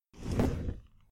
A fast rolling chair rolling on a wooden floor.
{"fr":"Chaise de bureau rapide","desc":"Une chaise à roulettes roulant rapidement sur du parquet.","tags":"chaise bureau roulettes rouler roues"}